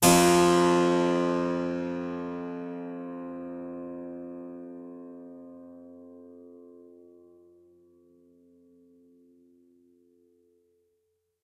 Harpsichord recorded with overhead mics
stereo, instrument, Harpsichord